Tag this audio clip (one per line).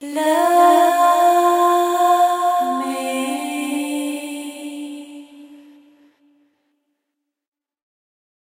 woman
vocal
sing
love
female